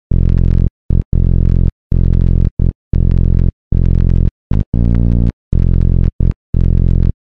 Bass tr11-06
one more bassline
bassline, electronica, trance